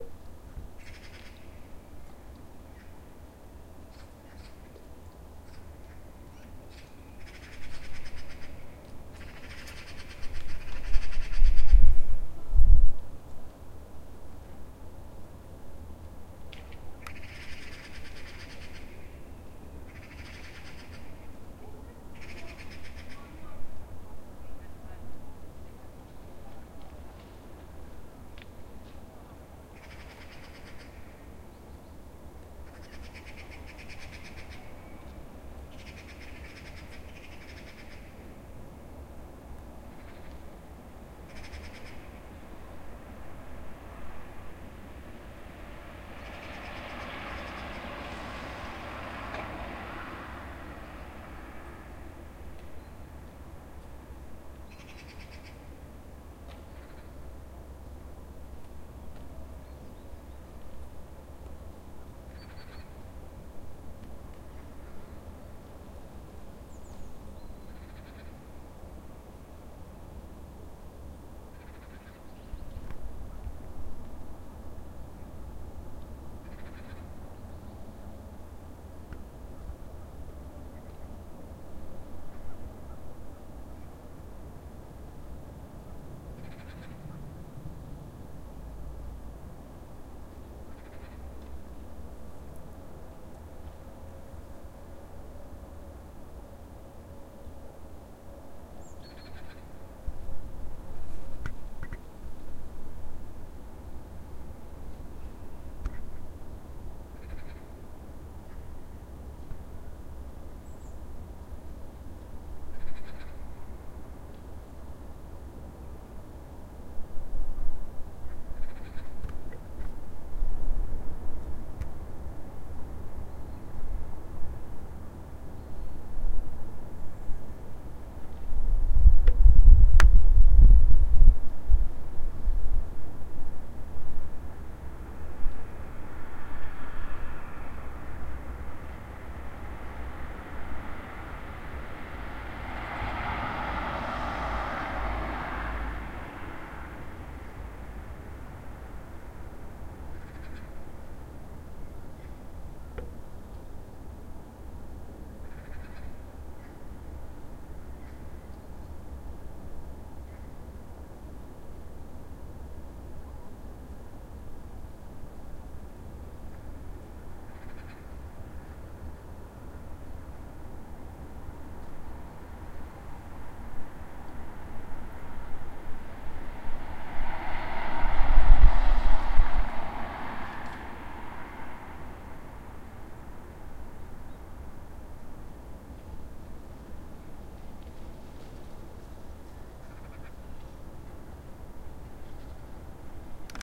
sounds from my window
In this sound a bird a nd a few passing cars can be heard.
car, window, Bird